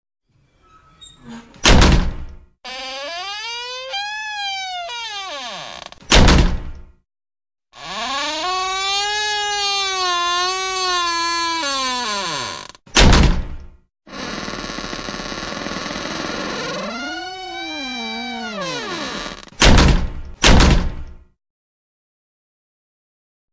many door slamming
door, indoor, slam